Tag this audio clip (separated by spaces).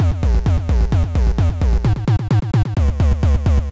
distorted happy-hardcore loop synth